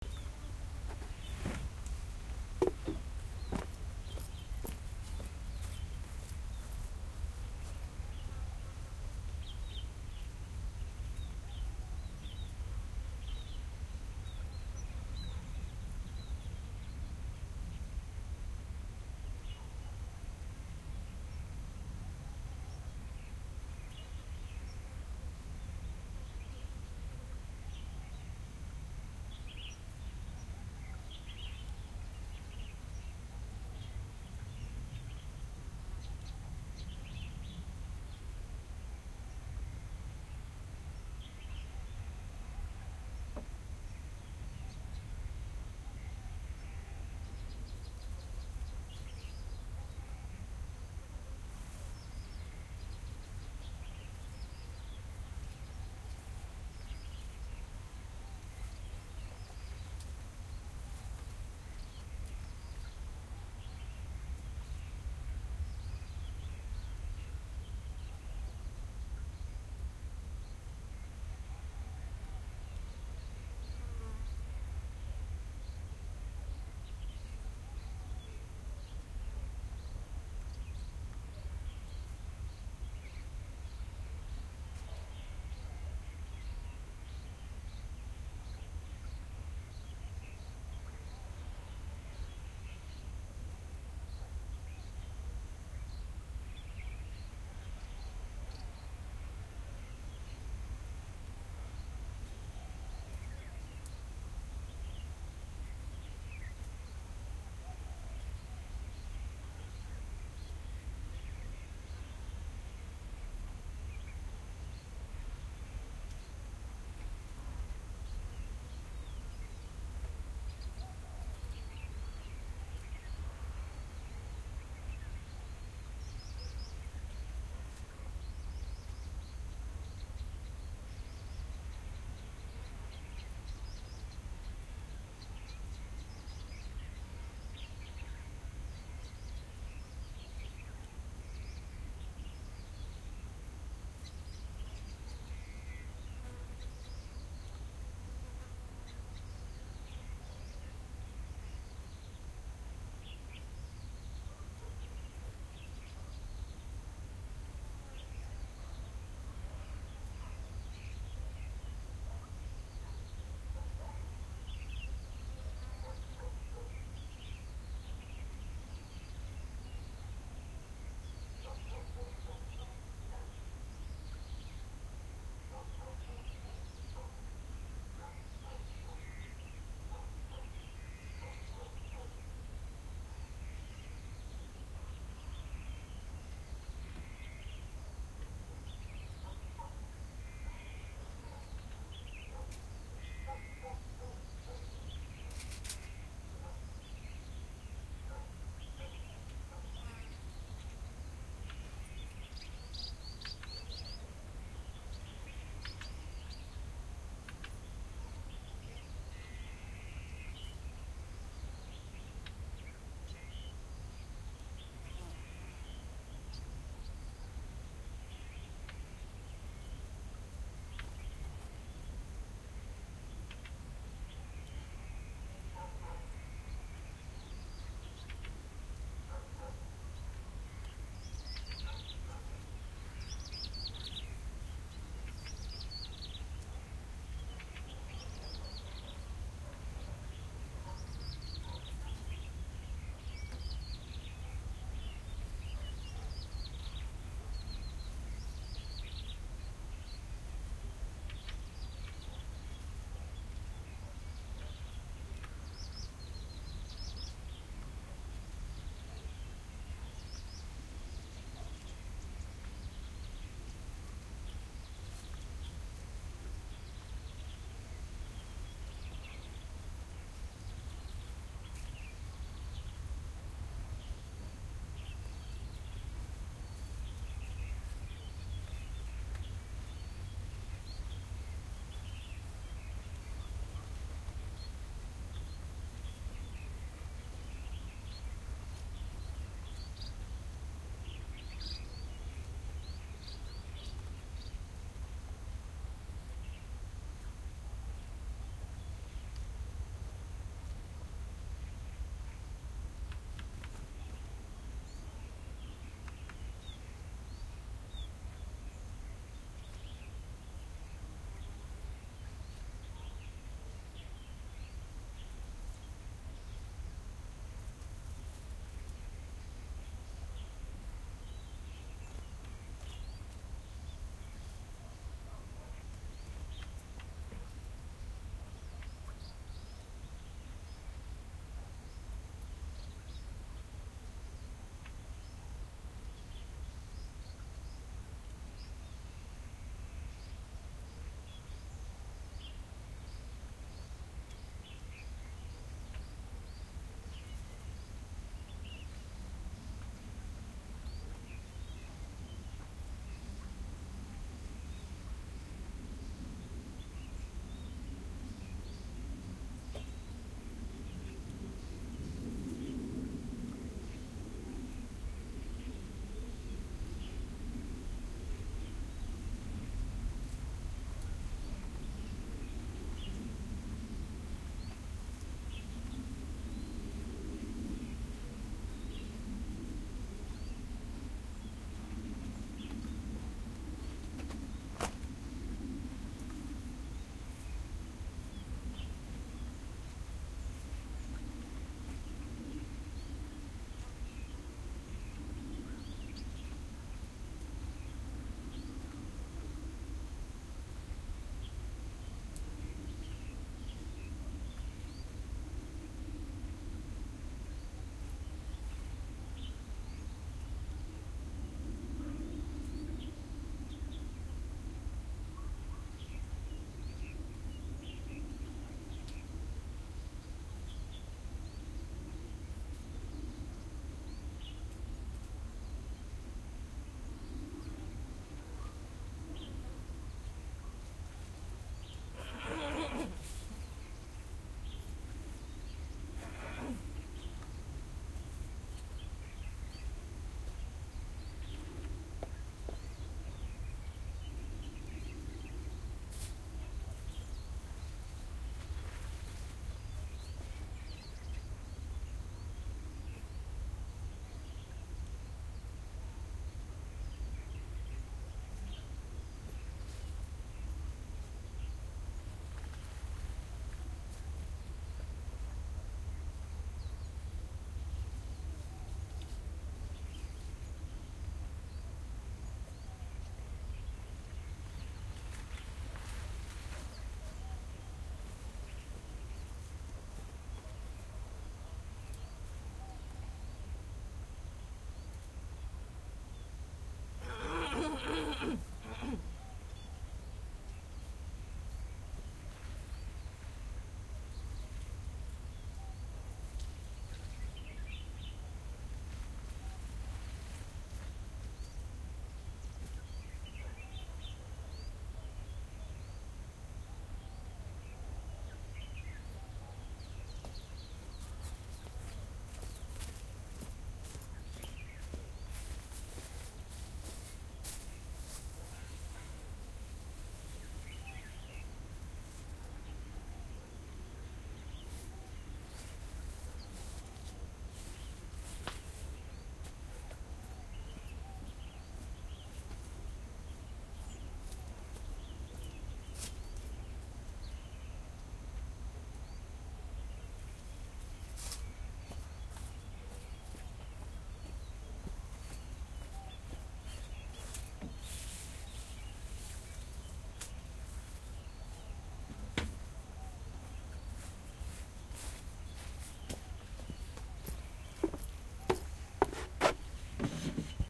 Stereo recording in a farm on iPhone SE with Zoom iQ5 and HandyRec. App.